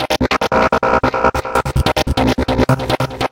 Psy Trance Loop 145 Bpm 10
goa, Loop, Psy, psytrance, Trance